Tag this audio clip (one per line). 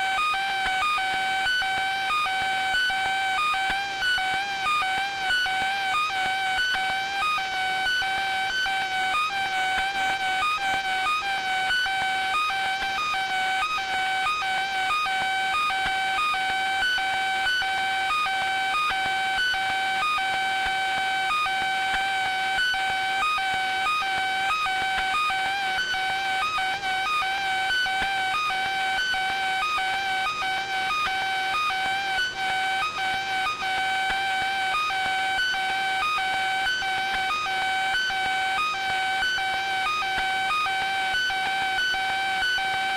cordless-phone
strange
click
buzz
interference
noise
tone
glitch
digital
electric
pulse
rhythm
electro-magnetic
EMF
am-radio
abstract
EMI
beep
pitch-bend
hiss
hum
charger
electronic
t
playing
lo-fi
radio-interference